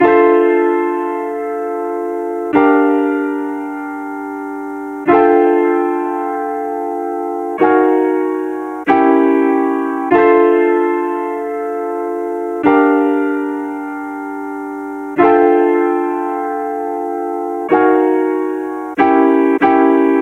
Dusty Lofi Piano Loop 95 BPM
hiphop, loops, pack, chill, bpm, Dusty, sound, lo-fi, piano, melody, sample, relaxing, packs, Vinyl, music, 95, lofi, nostalgic